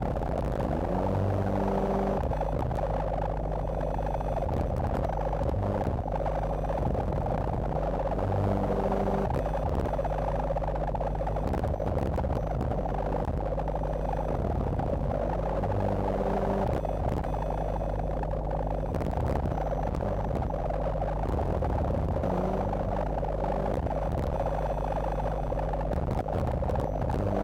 Arctic Noise Alarm sounds like frosty interference, a bit spooky, very textured, lots of noise , siren-like
A never ending cycle of pure angst
made with modular synthesiser
zoom h6
This sound is part of the Intercosmic Textures pack
Sounds and profile created and managed by Anon
alarm, cold, distorted, noise, sfx, sound-effect, soundscape, tense, wobbly